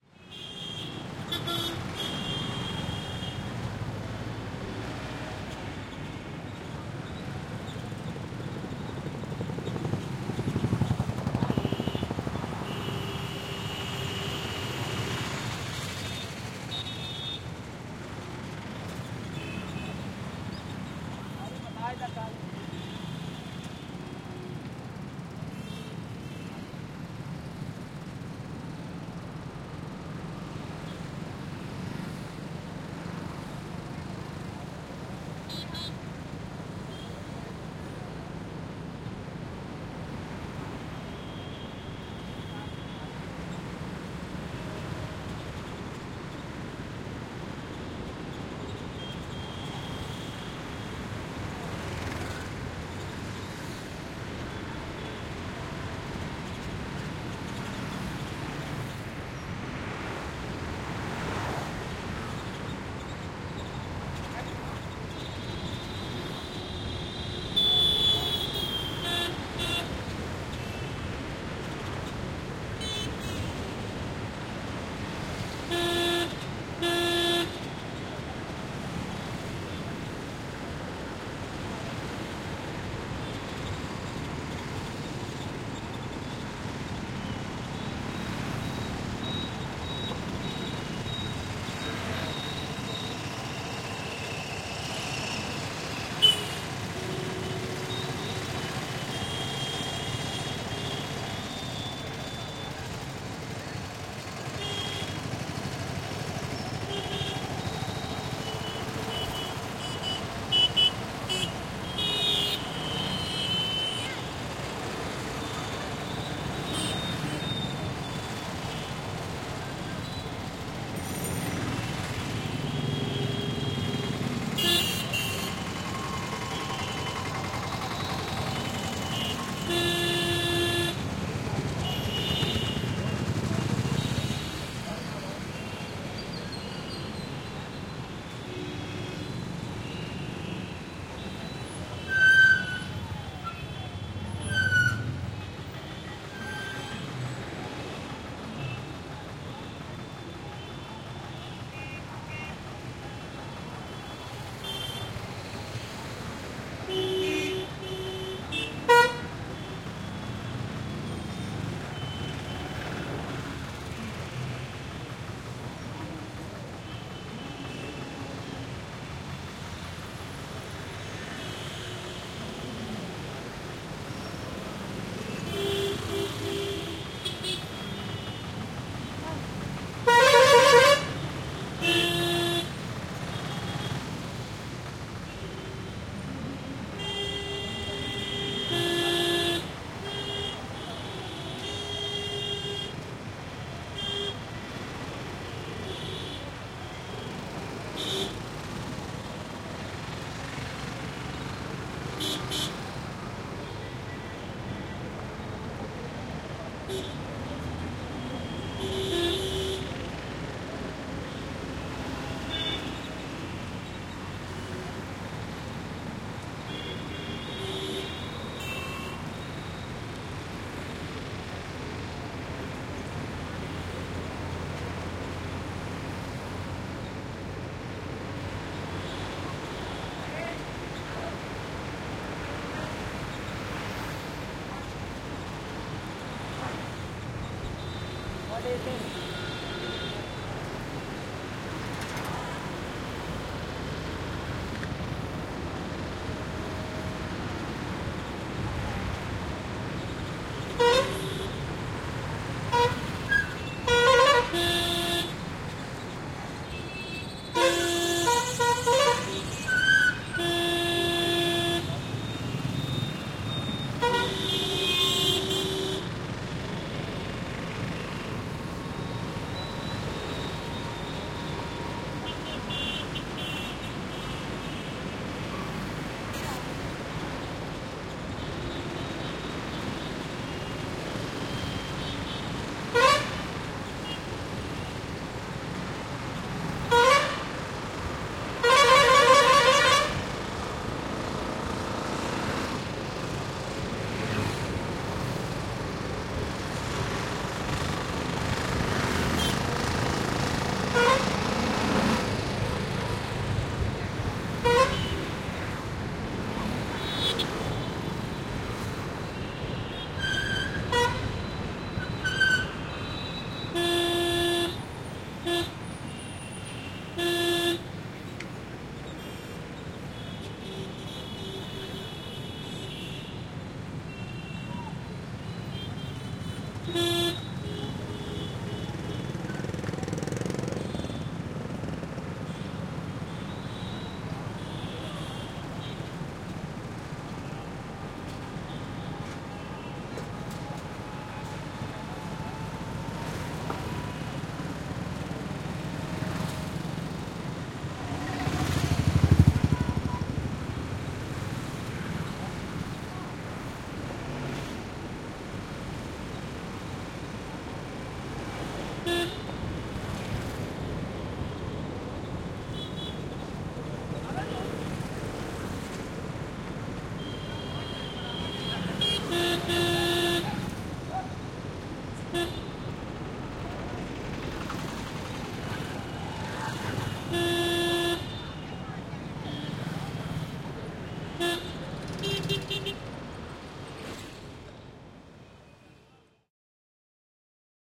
Recording of India's Allahabad traffic in M/S Stereo.
background, car, chaos, city, horn, India, motor, scooter, traffic, voices